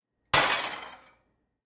Metal Objects Impact
various metal items